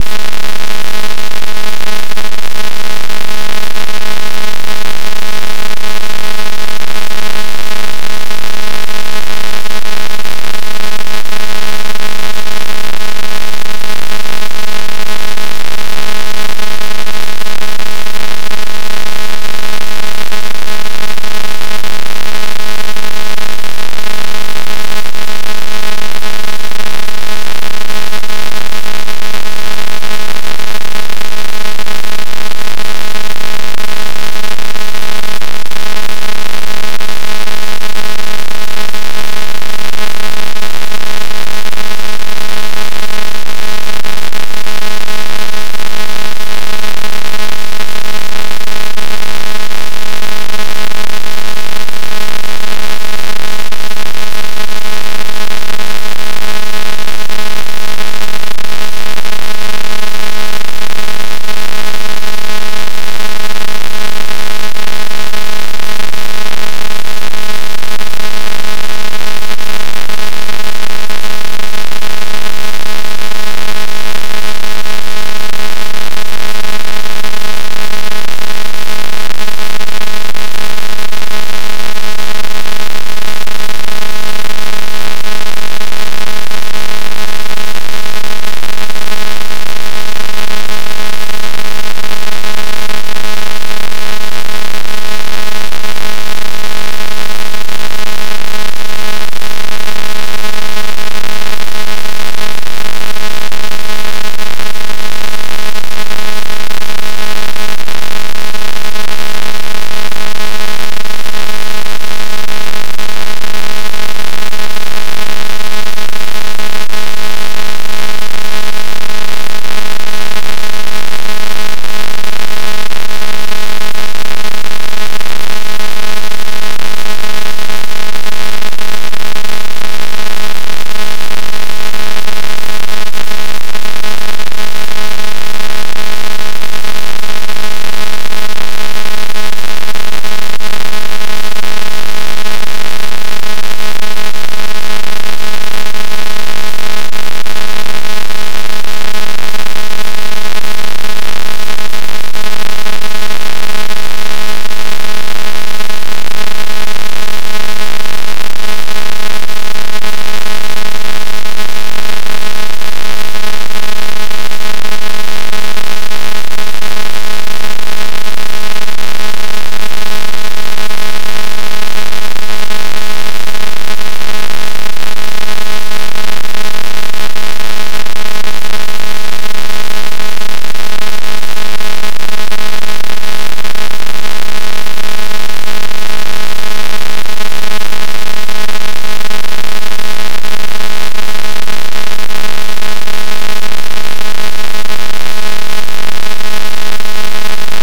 Raw data made in Audacity from over 4100000 digits of π! (pi!)